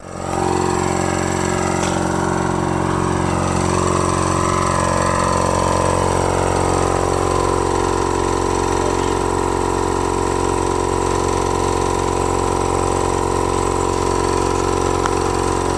this is the sound of the compressor used with the plasma cutting system. which consists on air from the compressor and conductivity. it is a really great machine!